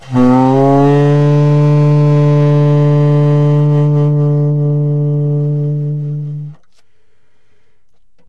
Tenor Sax d2
The format is ready to use in sampletank but obviously can be imported to other samplers. The collection includes multiple articulations for a realistic performance.
jazz, sampled-instruments, sax, saxophone, tenor-sax, vst, woodwind